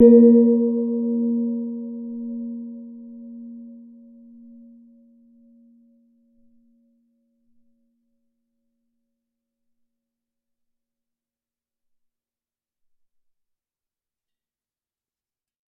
Gong (Vietnam, small) 02
Vietnamese gong about 30cm ⌀. Recorded with an Oktava MK-012-01.
gong, metal, percussion, Vietnam